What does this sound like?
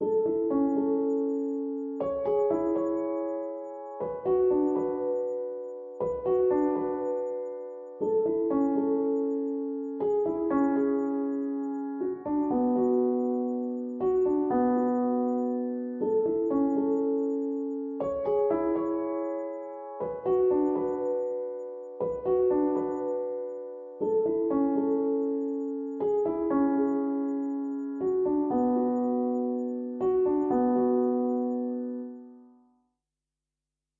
Piano loops 030 octave up short loop 120 bpm
120, 120bpm, Piano, bpm, free, loop, reverb, samples